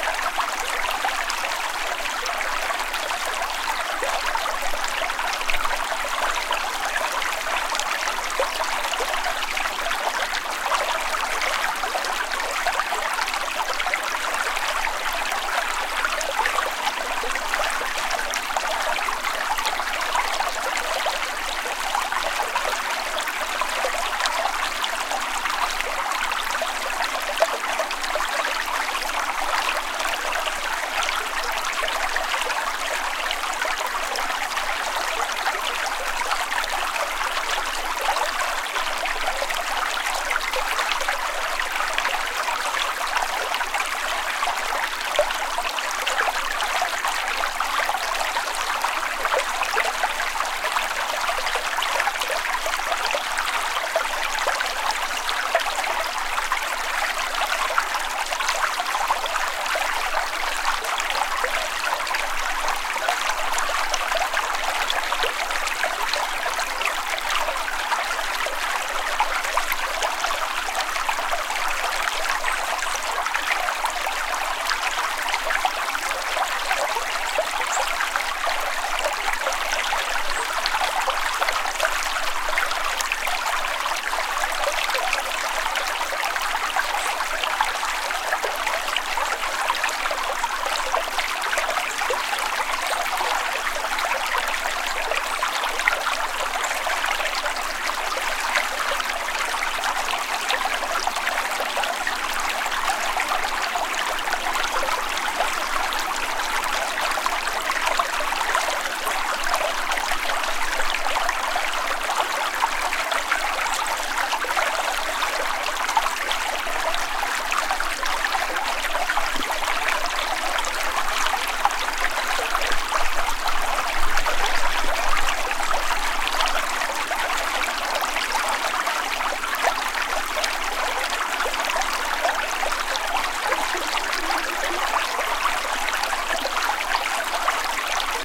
Creek at a smooth-flowing section with no rocks or other obstacles, recorded in a remote wooded area on a Sony HiMD MiniDisc recorder using a Rode NT-4 stereo mic with a Rycote windscreen.
liquid, brook, babbling, relaxing, creek, trickle, flowing, water, stream